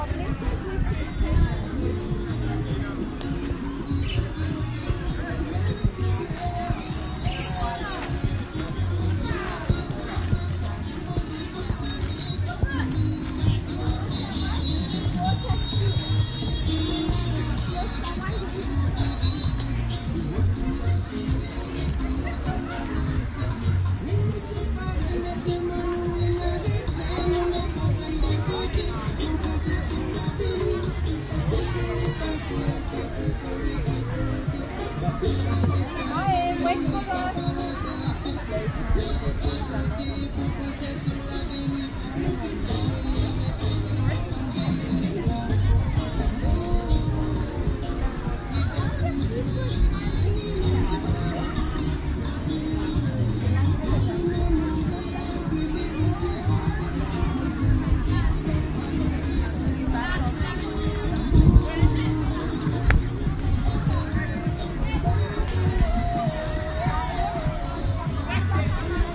Lively Beach in Grand Gaube, Mauritius
People talking, eating, celebrating, playing and swimming on a beach in Grand Gaube, Mauritius.
Africa, Beach, Island, Mauritius, Party, People, Social